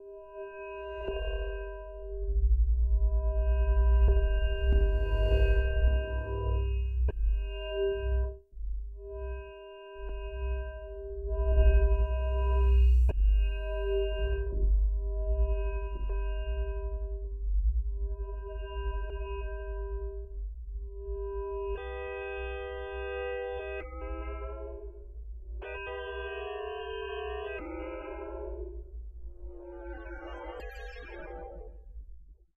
Stereo Pad
Using 4ms SMR.